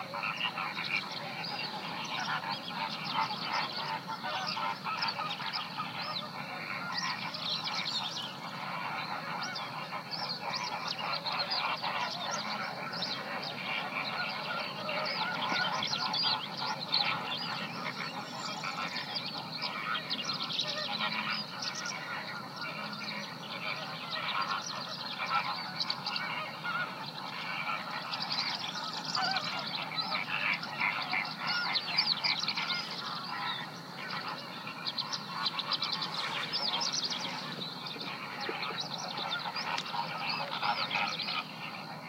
20060426.lobo.dusk.flamingoes
noises at a distance from a colony of flamingoes in the marshes, at dusk. Sennheiser ME62 into iRiver H120 / ambiente en una colonia de flamencos, al anochecer
birds, field-recording, flamingoes-colony, insects, marshes, nature, pond, spring